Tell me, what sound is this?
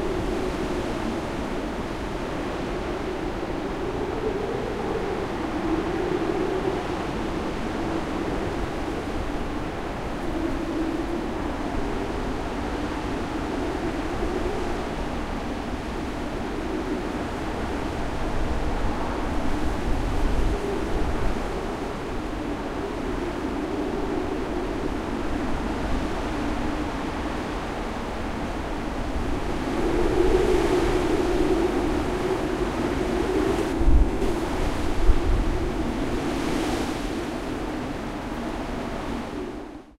Hurricane Ophelia - Youghal, Co. Cork, Ireland - 16th October 2017